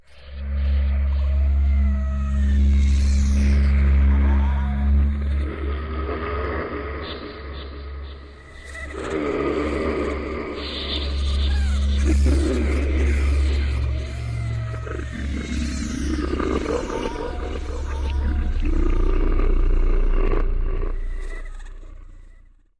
Scary Demon Haunting Sound - Adam Webb - Remix 2
This is an edit, so full credit should go to SoundBible and Adam Webb.
Halloween, creepy, demon, haunted, haunting, possessed, scare